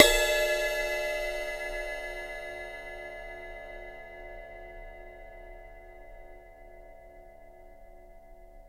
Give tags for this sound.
cymbal perc ride

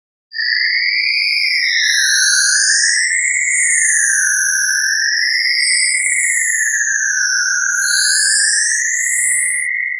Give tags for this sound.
creature horrible weird shout crazy scream insect alien annoying shut-up

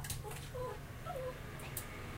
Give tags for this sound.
ambience patio

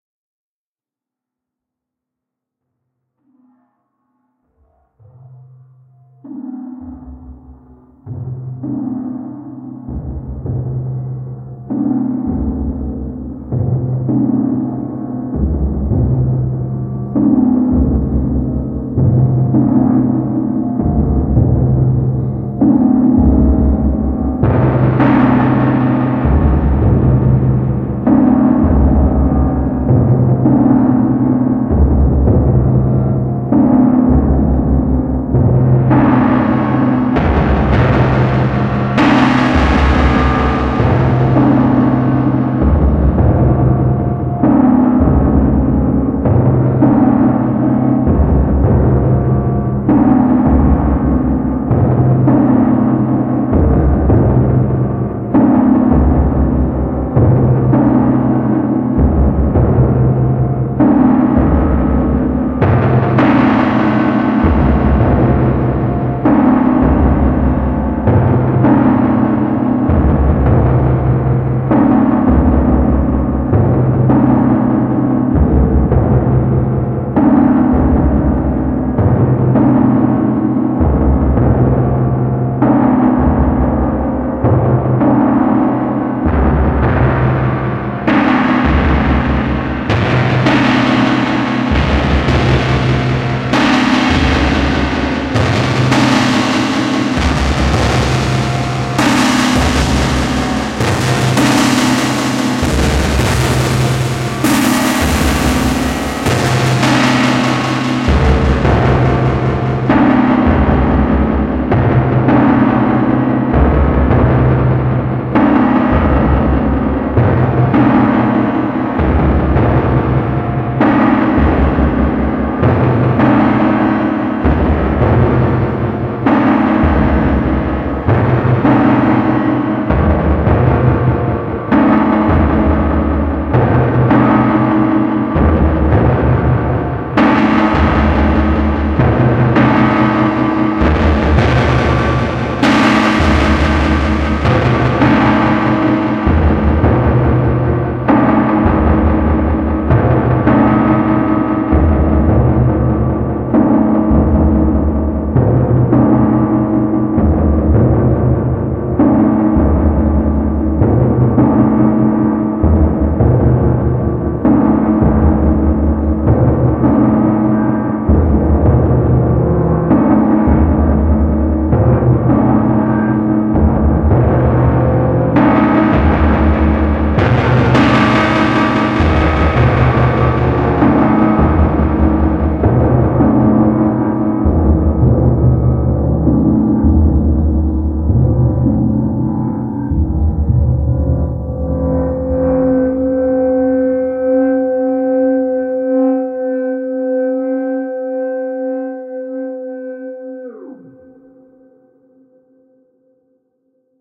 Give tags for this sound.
experimental improvisation modular

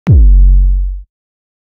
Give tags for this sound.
Bass-Drum Drum Drums EDM Electronic House Kick Sample

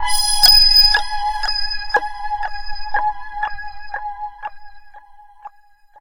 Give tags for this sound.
distorted lead pulsating

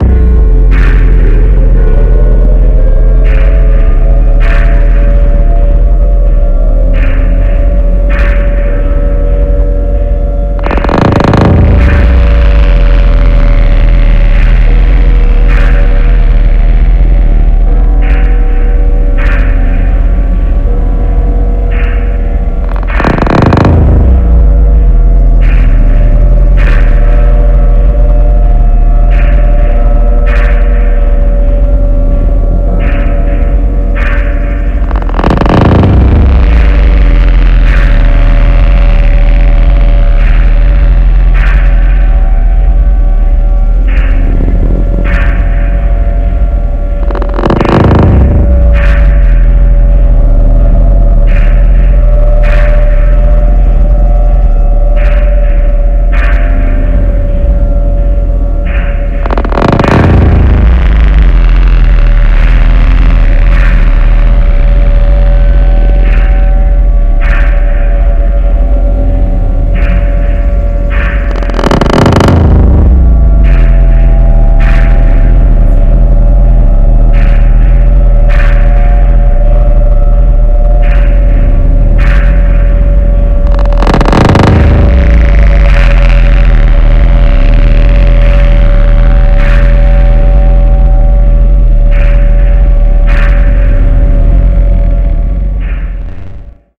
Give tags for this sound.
Creepy Horror Scary

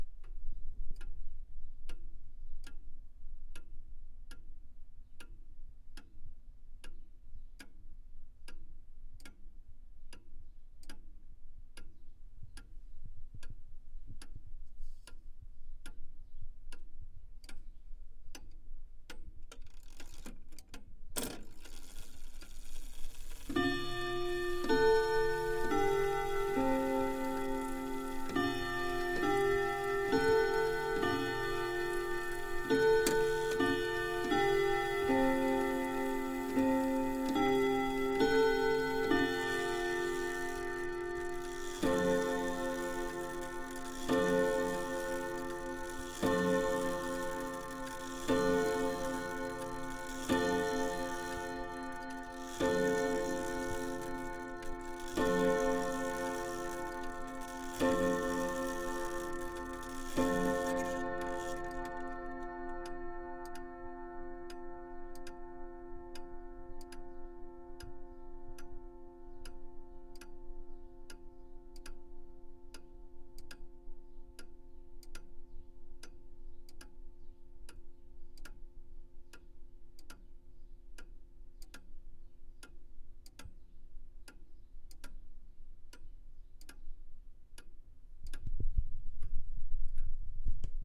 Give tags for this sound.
chime clock daytime hallway

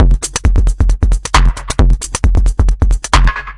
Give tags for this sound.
beat phat reverb soundesign fast experiment syncopate rhytyhm loop breakbeats drum-machine hard funk elektro filter dubstep abstract electro dj heavy distorsion dance techno percussion drum club phad producer processed

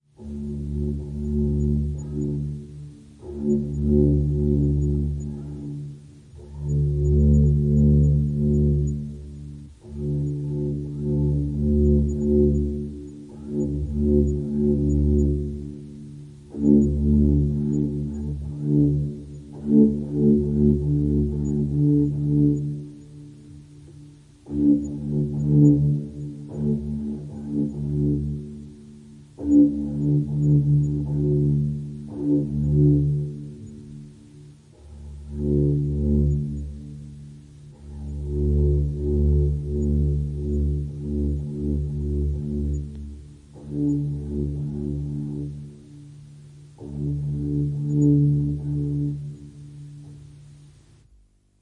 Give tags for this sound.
grave transformation flute

